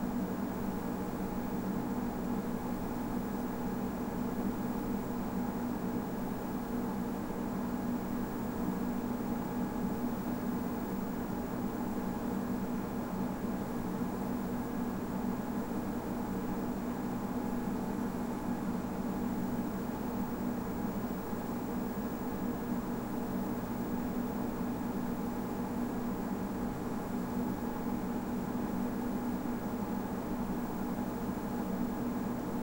Editing suite front
4ch-surround field recording of a TV editing suite with all machines running.
Very useful as a neutral backdrop for any kind of motion picture or radio play requiring an "techy" feel to the atmosphere.
Recorded with a Zoom H2, these are the FRONT channels, mics set to 90° dispersion.
atmo, ambience, room, drone, ambient, machine, surround, rooms, technical, field-recording, neutral, backdrop